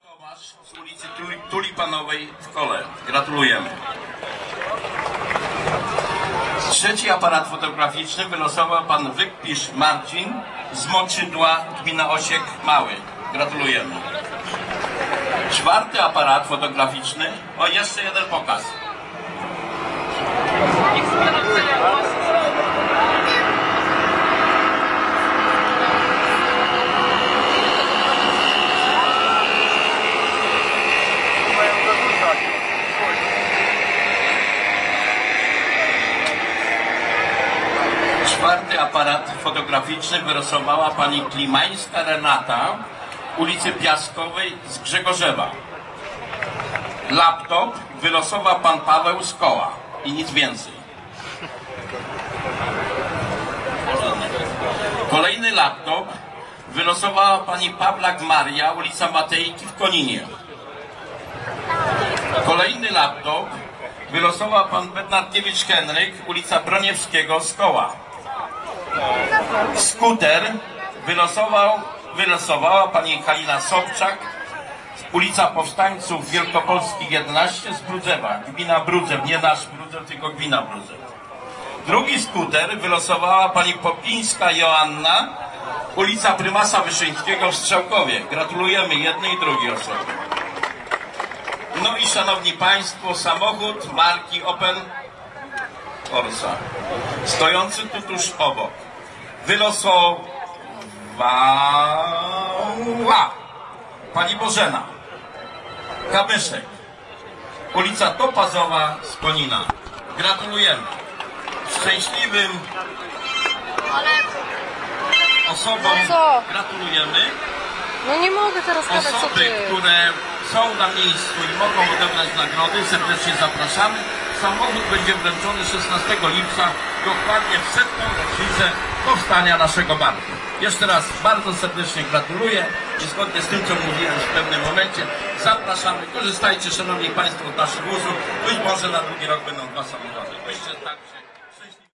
day of strzalkowo adjudication of bank prize competition270610
27.06.2010: the Day of Strzalkowo village (village in Wielkopolska region in Poland). I was there because I conduct the ethnographic-journalist research about cultural activity for Ministerstwo Kultury i Dziedzictwa Narodowego (Polish Ministry of Culture and National Heritage). the Day of Strzalkowo is an annual fair but this year it was connected with two anniversaries (anniv. of local collective bank and local self-government).
the adjudication of Local Collective Bank prize competition. recorded about 18.00.
adjudiction, anniversary, celebration, competition, day-of-strzalkowo, festivities, field-recording, people, poland, prize-competition, scene, village-fair, voice, wielkopolska